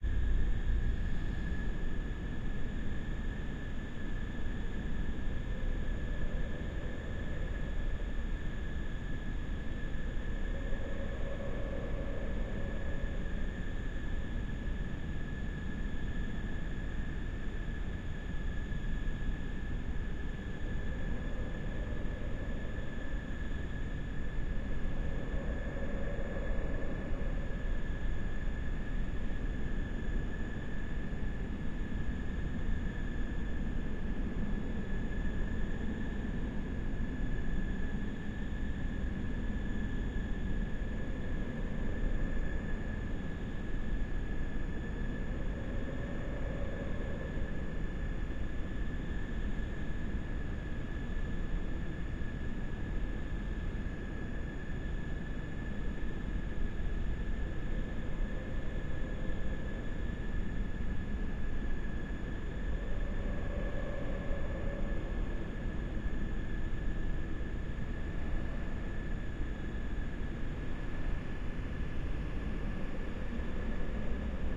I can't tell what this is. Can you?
Edited version of one of my frog recordings processed with additional octave mixing in Paul's Extreme Sound Stretch to create a ghostlike effect for horror and scifi (not syfy) purposes.